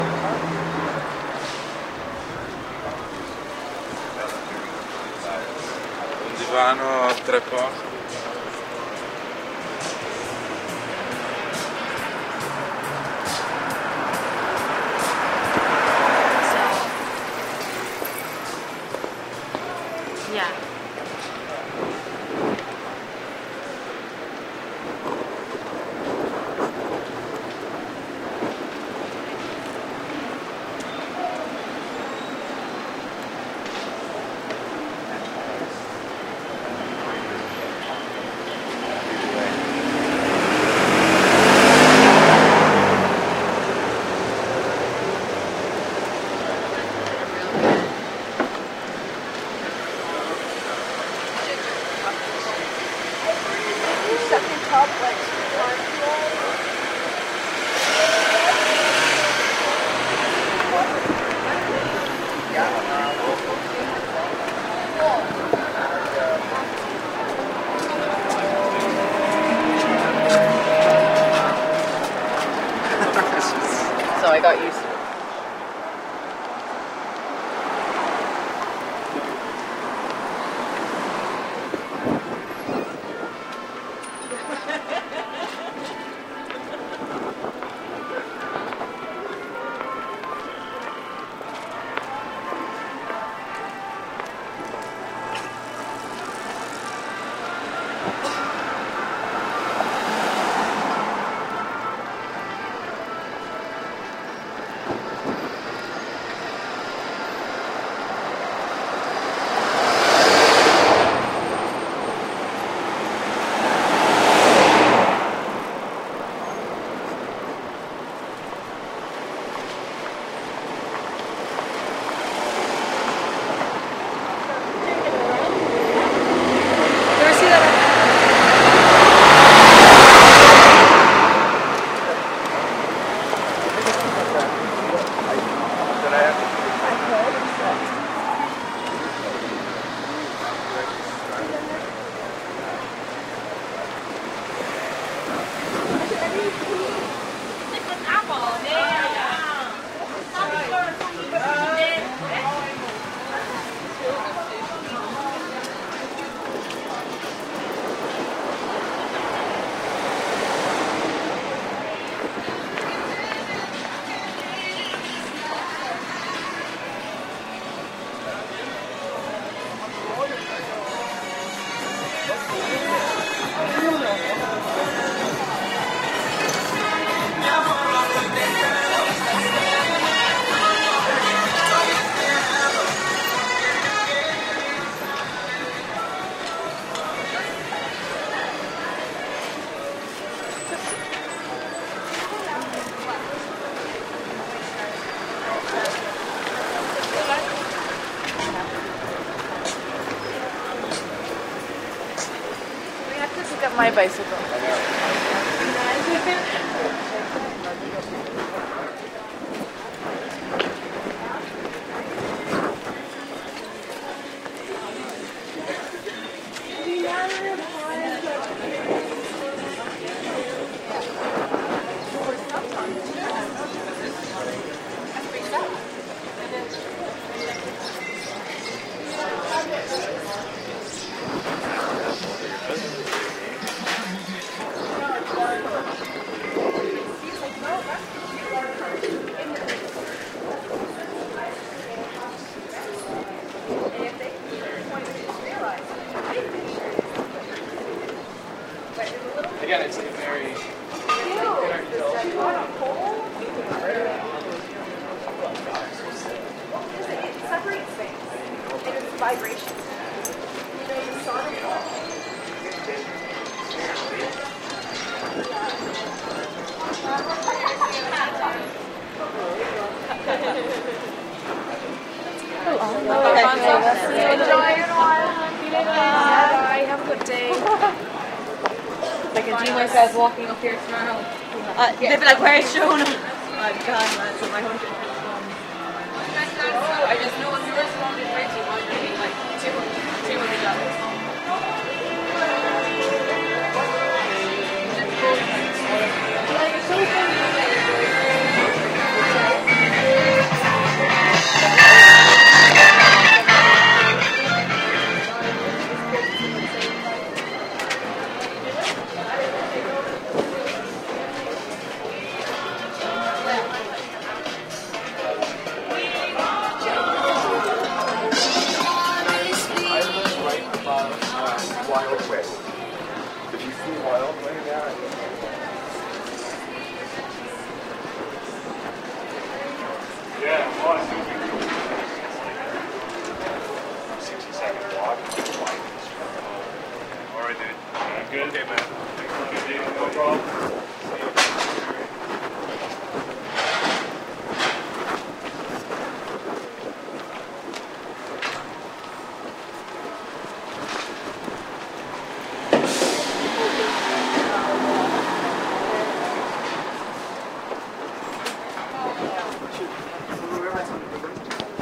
A walk through the streets of Toronto's Kensington Market, on 1 May 2012. Recorded with a H4N and Sennheiser MKE400 stereo condenser microphone.